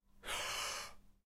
breaths solo3
Clean sample of a person breathing in rapidly, lot of air, 'shock-reaction.Recorded with behringer B1
air, breath, noise, shock, shocked, suspense, tension, wind